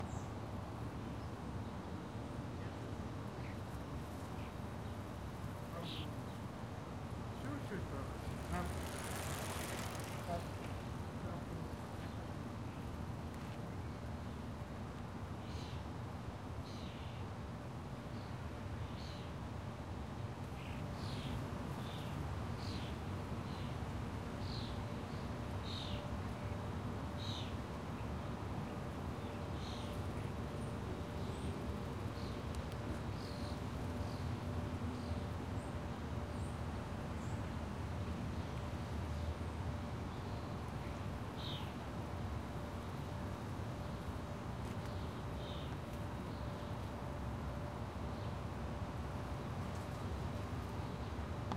Bicycle Passes 2
Bicycle Passes
recorded on a Sony PCM D50